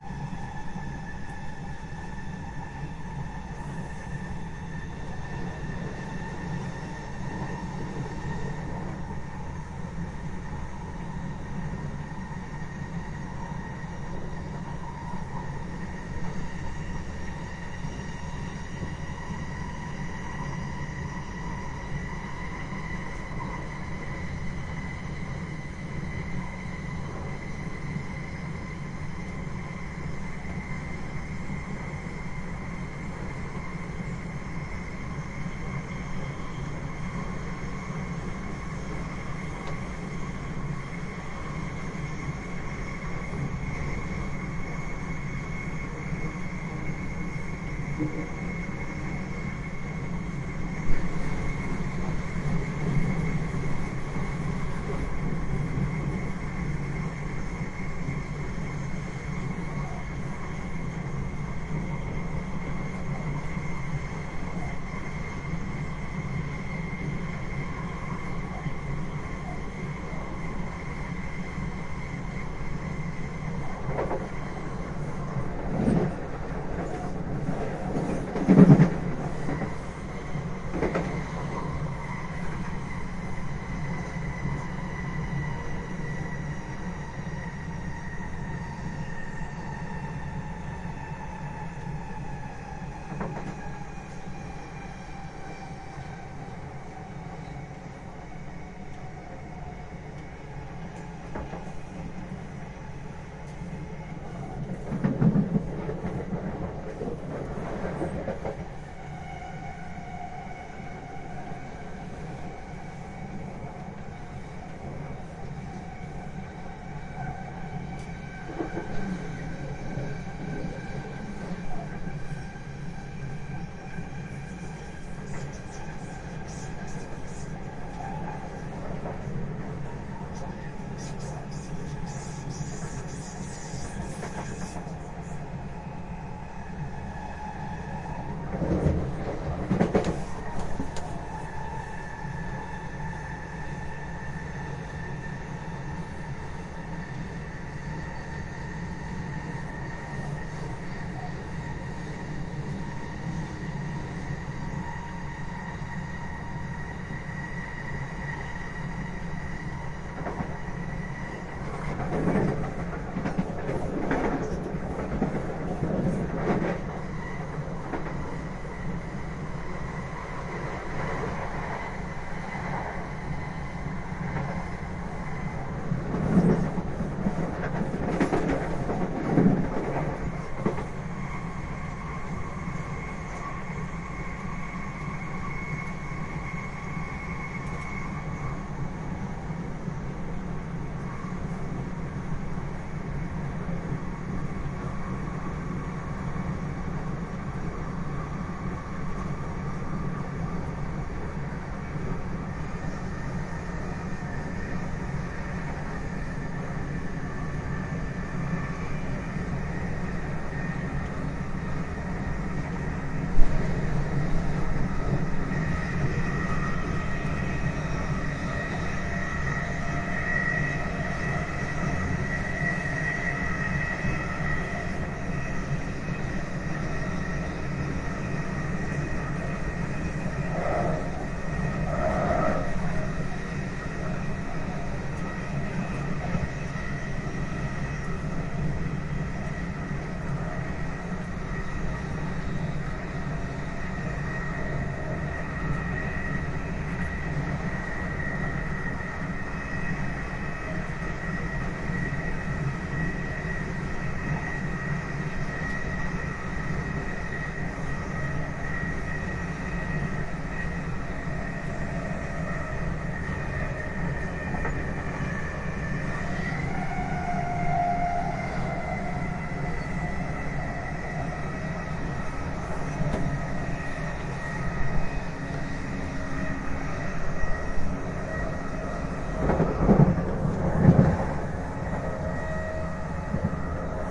London Tube Ride
Uninterrupted London Underground train ride on the Central Line between Liverpool St and Bethnal Green [Tascam IM2]
Ambience, Central-Line, Field-Recording, Inside, Interior, London, Ride, Subway, Train, Tube, Underground